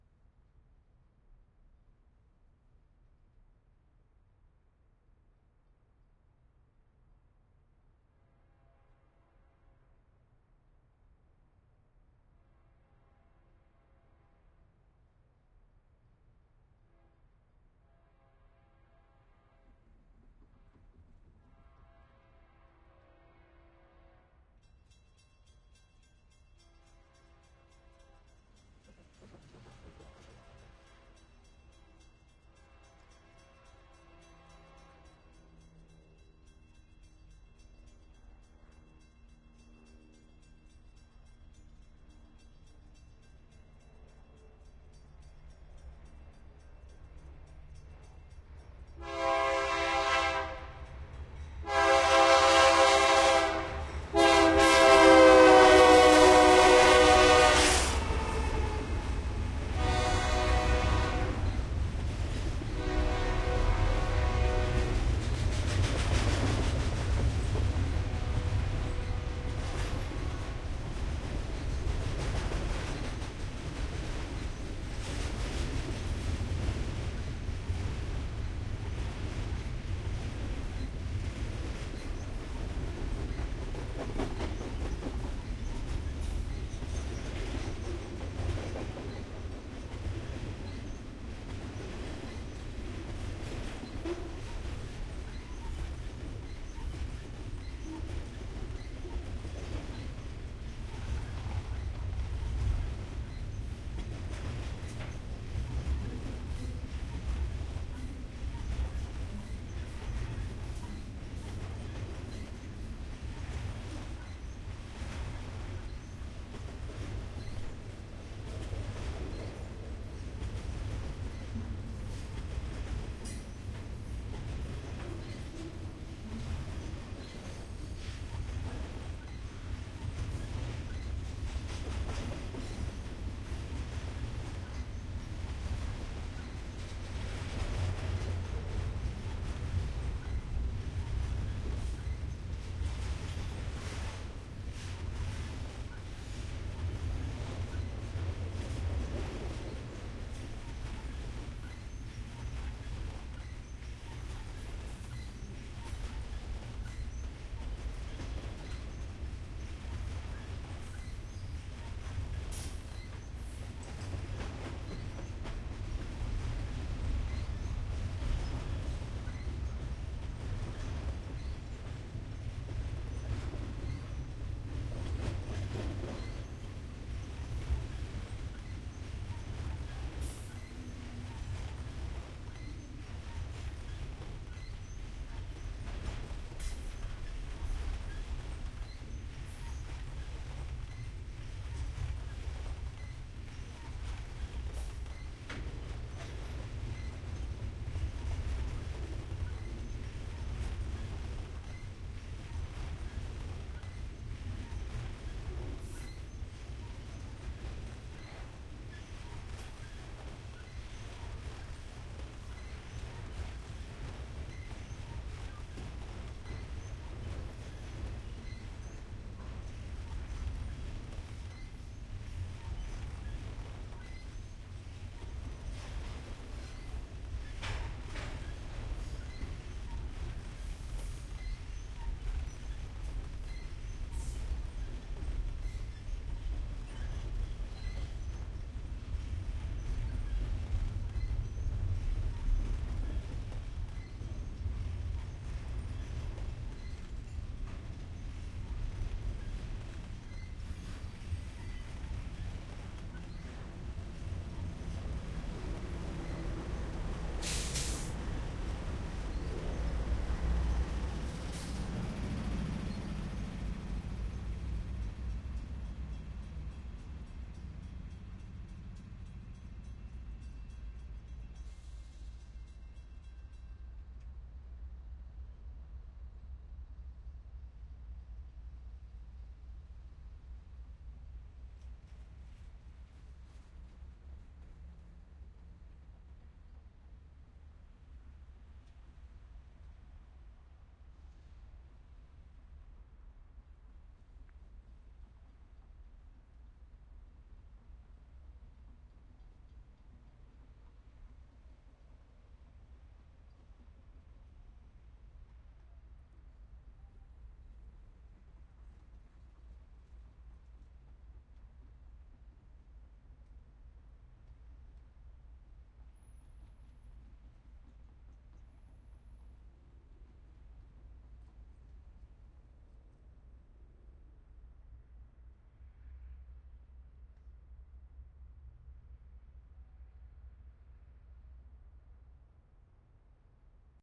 sample pack.
The three samples in this series were recorded simultaneously (from
approximately the same position) with three different standard stereo
microphone arrangements: mid-side (mixed into L-R), X-Y cardioid, and
with a Jecklin disk.
The 5'34" recordings capture a long freight train (with a helicopter
flying overhead) passing approximately 10 feet in front of the
microphones (from left to right) in Berkeley, California (USA) on
September 17, 2006.
This recording was made with a pair of Sennheiser MKH-800
microphones in a mid-side configuration (inside a Rycote blimp).
The "mid" microphone was set to "wide cardioid" and the "side"
was set to "figure-8", with the array connected to a Sound Devices 744T
Mixed into conventional A-B stereo in Logic Pro.
airhorn, diesel, field-recording, freight, helicopter, horn, locomotive, m-s, mid-side, ms, railroad, sennheiser, train